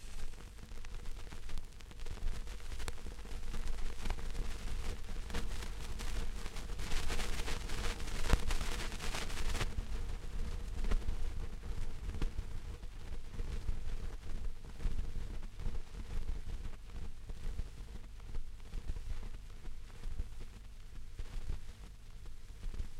Vinyl crackle and hum.